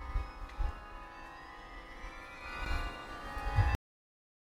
A short recording of the Oslo carillon in the Rådhus (townhall) being played
chiming, R, dhus, townhall, oslo, playing, carillon